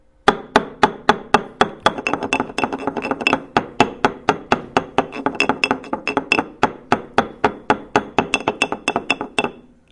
mySound GPSUK pestle&mortar
A pestle & mortar
Primary, Galliard, UK, mortar, pestle, School